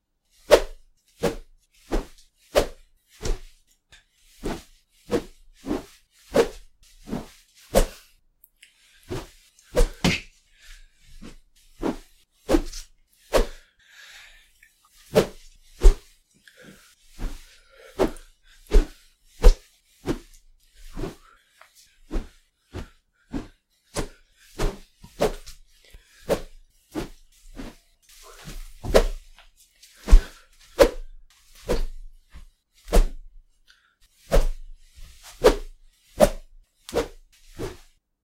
Swoops for fight etc
Some shwooshes i made for a shot film of mine